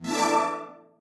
Victory or bonus sound - fake trumpet feel